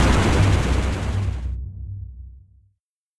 A chiptune explosion made as a game over sound.
bang, boom, chiptune, dead, death, explode, explosion, game, game-over, video-game, videogame